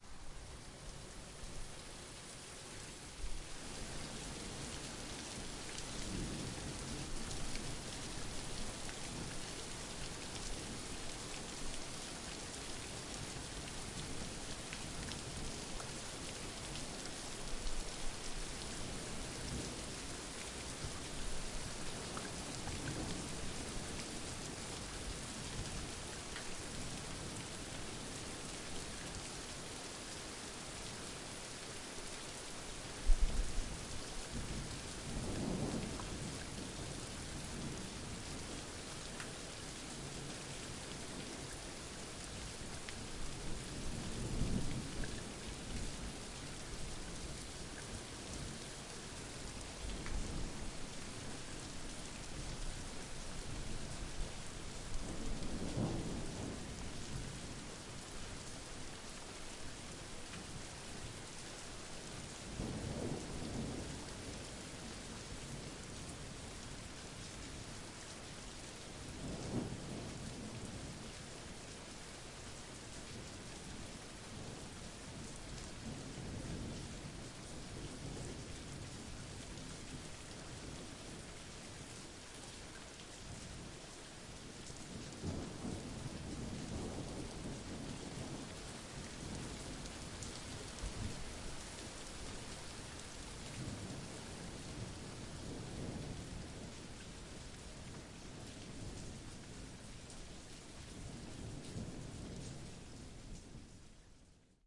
Recording of really heavy rain with distant thunder.
Equipment used: Zoom H4 recorder, internal mics
Location: Cambridge, UK
Date: 16/07/15